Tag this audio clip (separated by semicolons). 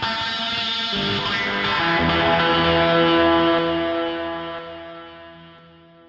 electronic; wah-wha; wah